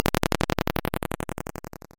A randomly generated 8-Bit sound.